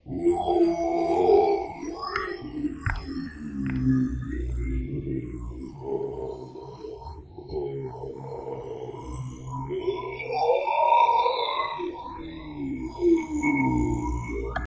A creepy and gutural monster Scream...